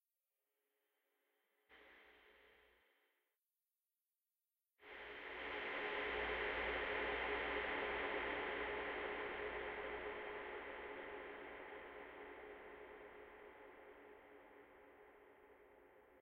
Surround dronetail -07
Somebody dropped something in the liquid hydrogen ocean on Pluto and
this is the sound of it. This is a sample from the "Surrounded by
drones pack 1" sample pack. It is a sample recorded as 5.1 surround 16
It was created within Cubase SX.
I took a short sample from a soundscape created with Metaphysical
Function, an ensemble from the Electronic Instruments Vol. 2 from
Native Instruments, and drove it through several reverb processors (two SIR's using impulses from Spirit Canyon Audio and a Classic Reverb
from my TC Electronic Powercore Firewire). The result of this was
panned in surround in a way that the sound start at the center speaker.
From there the sound evolves to the back (surround) speakers. And
finally the tail moves slowly to the left and right front speakers.
There is no sound for the subwoofer. To complete the process the
samples was faded at the end and dithered down to 16 bit.
deep-space, space